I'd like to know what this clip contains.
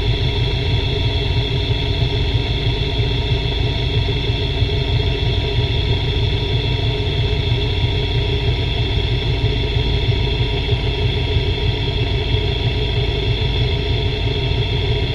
AC Compressor (on)
Using an automotive stethoscope on an AC compressor.
compressor, sound, stethoscope, AC